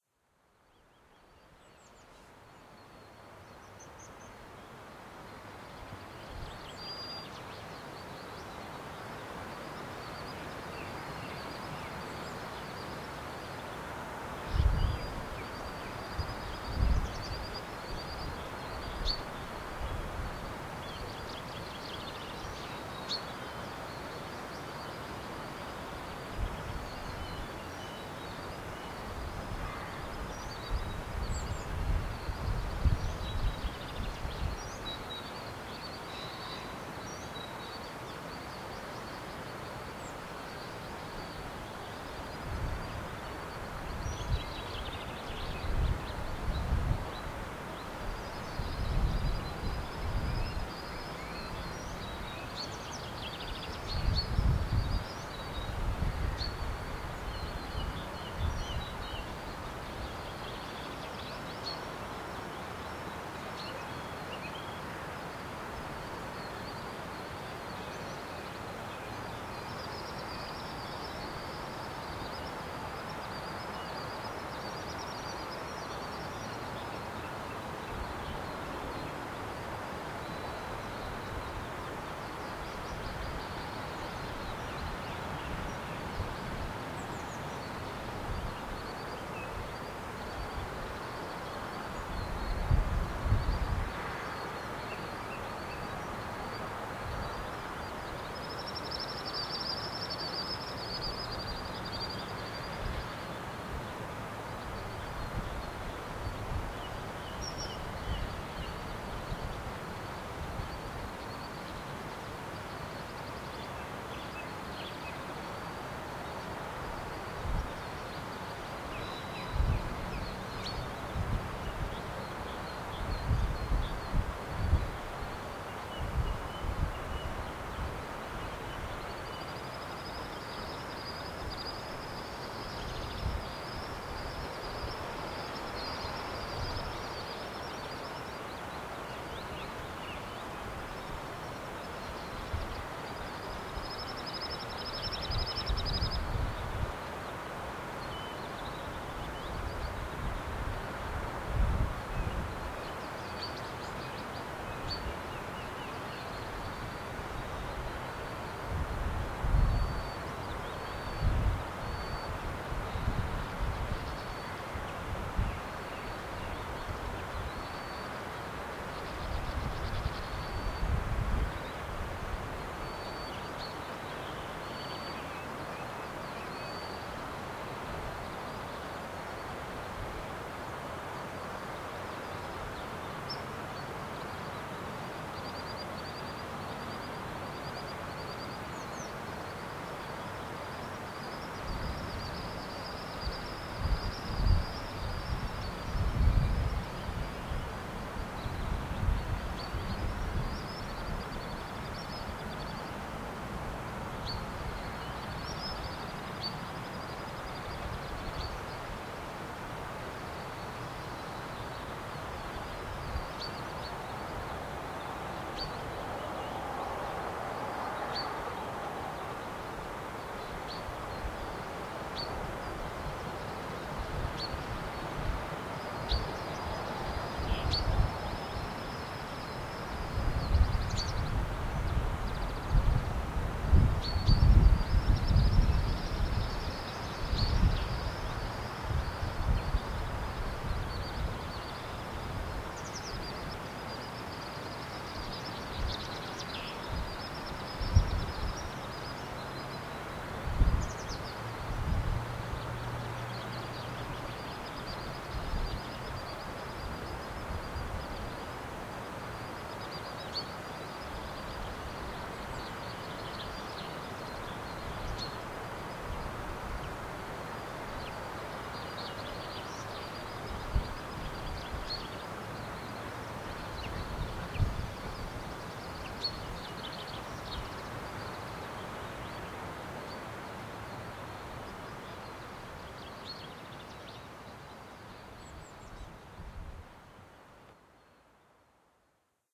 Borlum-Bay
Recording on the shores of Loch Ness at Borlum Bay in Fort Augustus. Birds song, chaffinch, swifts, wind in the trees. Quiet recording.
bird-song, field-recording, Loch-Ness, swifts, wind